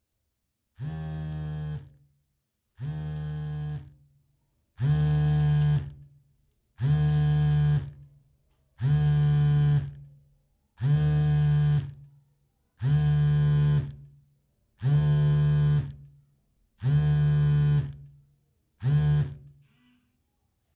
Cellphone Vibrating on a Table
Sound of a vibrating cellphone on top of a table.
Recorded with Røde NTG3 + Marantz PMD 661 MKII
table, cellphone, vibration